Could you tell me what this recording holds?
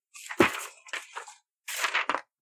Paper Hitting Ground0
Paper falling and hitting the ground free.